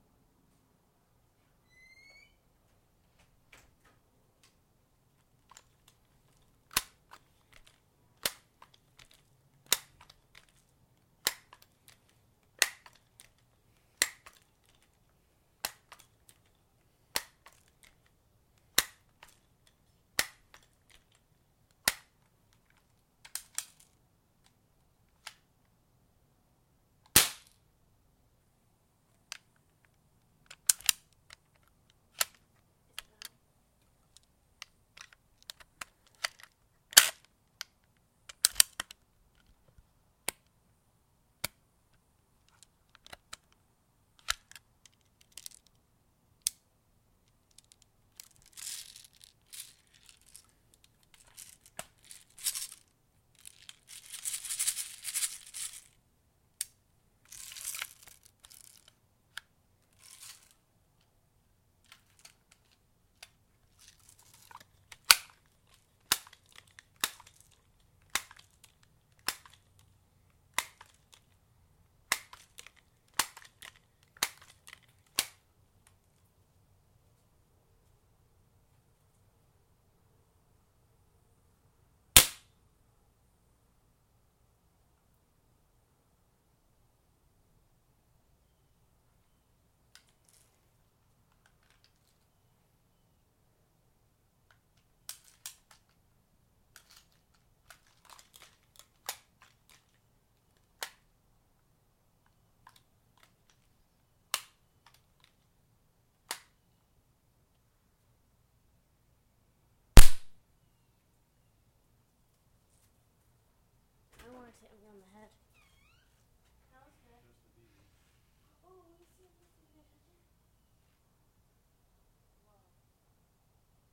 This is the gun in the same environment recorded with a Samson USB microphone direct to cool edit on the laptop from the shooters perspective. Pumping , loading, shooting blank shot, shooting armed shot, cocking trigger, rattling bb's, putting safety on and off. Not in that particular order though. Lots of percussive opportunities.